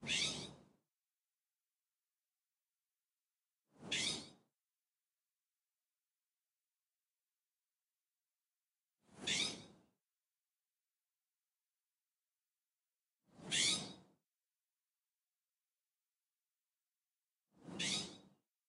A blue jay or scrub jay in the tree right outside my house. Ambient hiss removed.